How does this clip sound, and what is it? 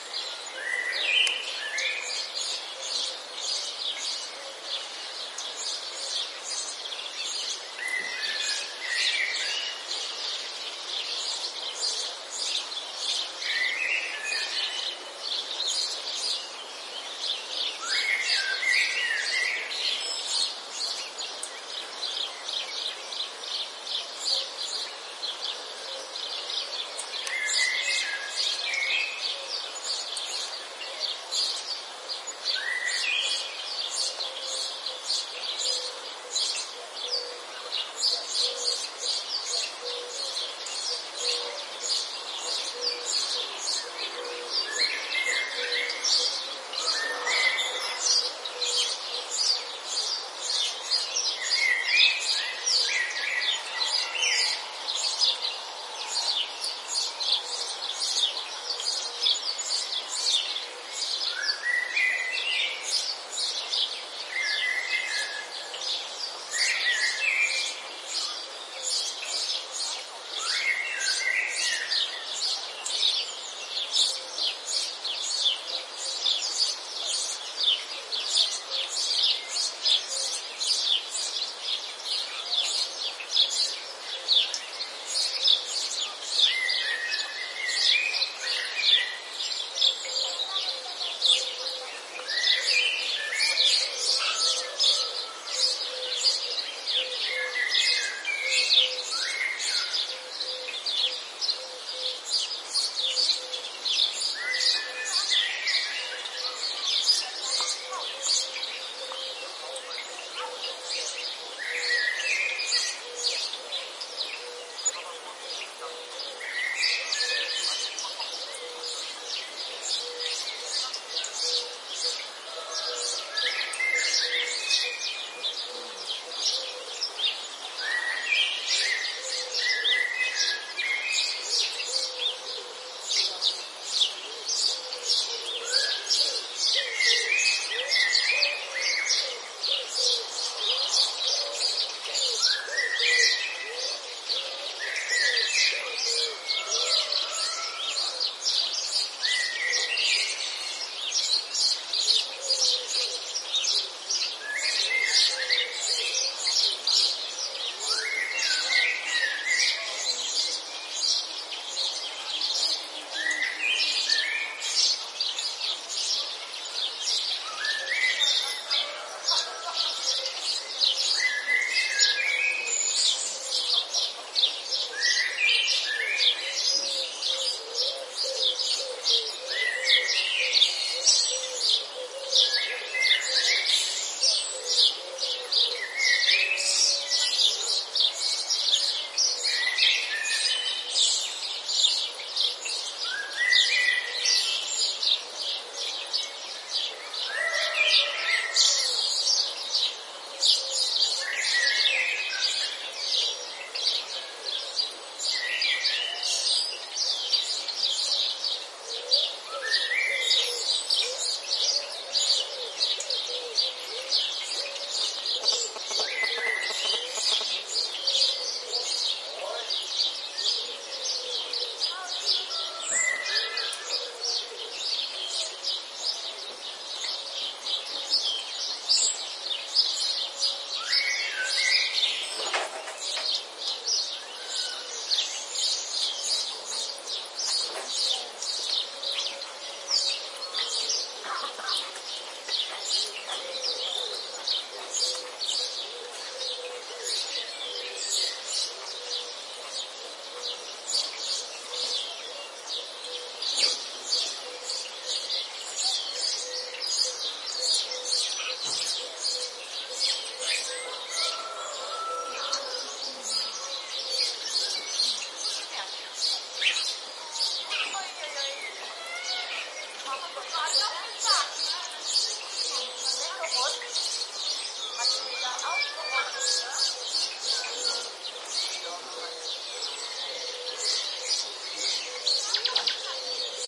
birds in my garden and neighbourhood
spring, birds, bird, nature, birdsong, field-recording